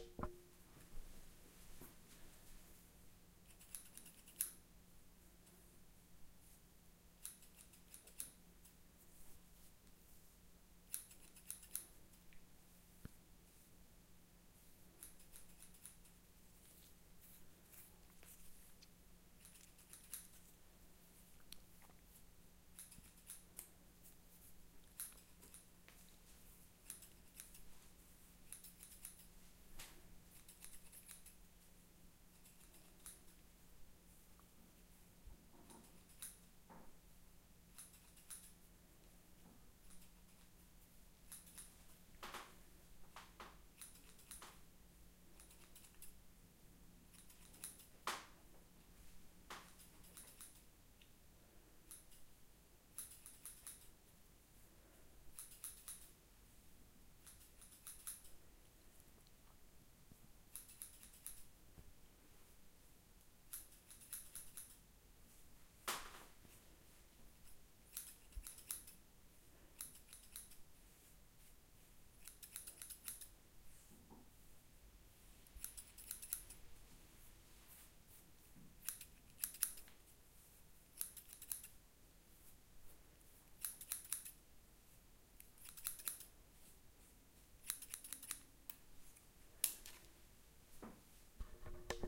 Snipping with hairdresser scissors, recorded close working around the head. No combing or other noise.
cutting, hair, scissors, hairdresser, Snipping, cut, salon